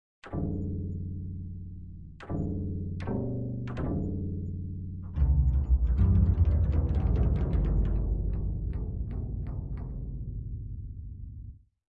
Toppling Strings
strings, devil, scary, evil, fearful, ghost, paranormal, thriller, nightmare, spooky, thrill, drama, terrifying, haunted, Halloween, dark, suspense, demon, doom, fear, ghostly, horror, creepy, phantom, spectre, hell, sinister, dramatic, intense